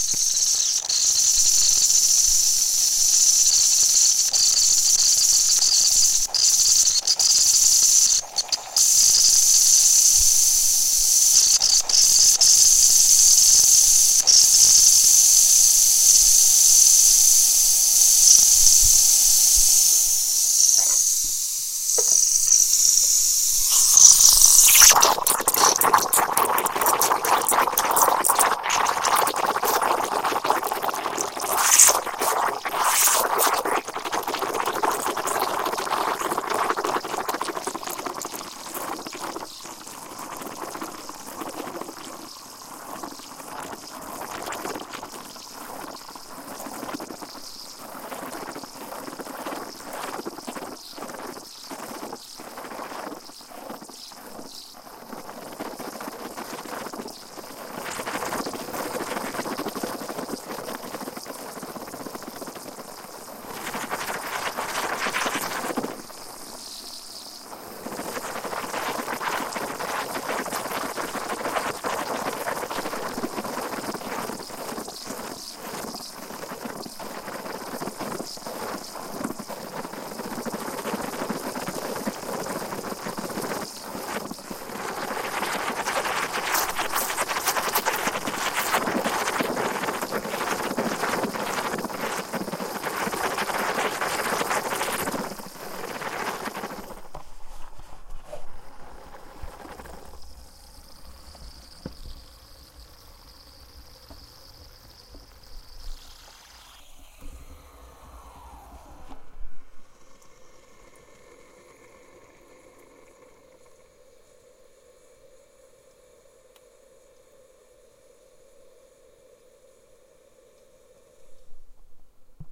Cappuccino machine frothing (steaming) milk. Some gurgles and bubbles, lots of steam sound. Recorded with Alesis ProTrack / iPod Touch using built in stereo mics.